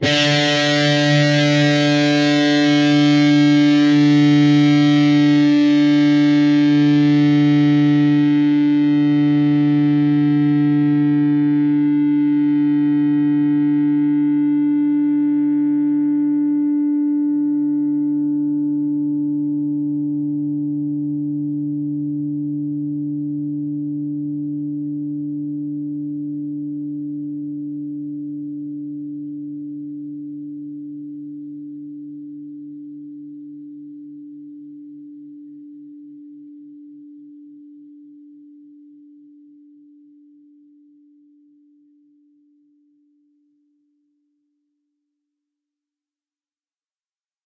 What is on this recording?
Dist sng D 4th str
D (4th) string.
guitar-notes, single-notes, distorted, guitar, distorted-guitar, distortion